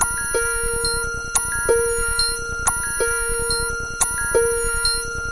9oBpM FLoWErS Evil Creams - 2
A sort-of ambient loop. Loopable @90bpm.
90bpm, ambient, experimental, loop, novelty, weird